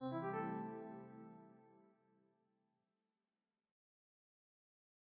A mellow sound to inform customers via the public announcement speaker system.